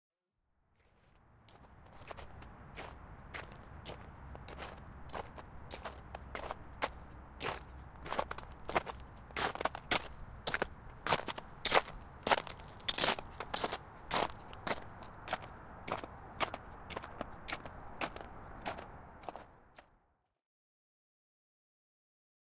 25 hn footstepsSneakerConcreteSnow2
Sneaker footsteps on heavy packed snow over concrete walkway.
snow, concrete, sneaker, footsteps